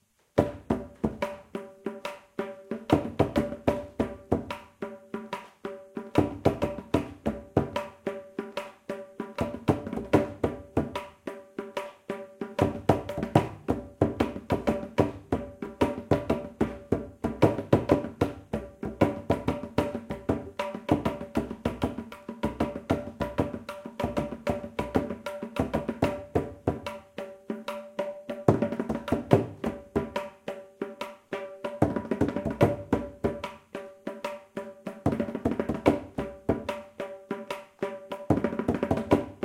Mridangam-Khanda
A short theka in khanda nade (Pentuple meter) on the Mridangam.
Adi-taala, Carnatic-music, CompMusic, Indian-percussion, Khanda, Mridangam, Pentuple-meter